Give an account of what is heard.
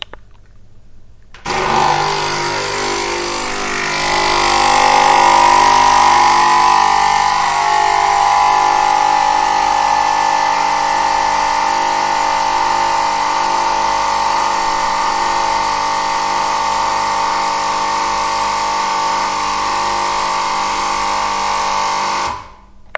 Sonic Snap Sint-Laurens
Field recordings from Sint-Laurens school in Sint-Kruis-Winkel (Belgium) and its surroundings, made by the students of 3th and 4th grade.
Belgium, Ghent, Sint-Kruis-Winkel, Sint-Laurens, Snap, Sonic